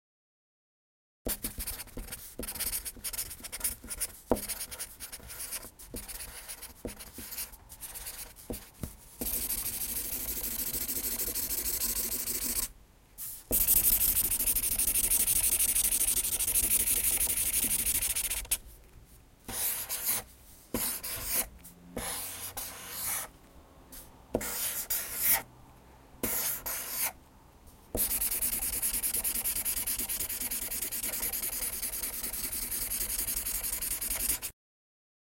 Sharpie Marker Pen Writing and Scribbling on Paper
Using a Sharpie marker pen to write and scribble on a piece of paper. Writing, a few dots to punctuate writing a sentence, drawing a heart then scribbling inside the heart shape to fill it in. Scribbling different speeds. Recorded on a Zoom H1.
different, drawing, fill, filling, heart, Marker, pen, scribble, scribbling, shapes, sharpie, speeds, variable